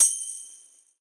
DB BELL SHOT 001
A single strike of a tambourine with a jingling sleigh-bell like sound.
bell, tambourine, jingle